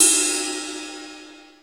Gui DRUM CYN hard
acoustic, drum, guigui, mono, set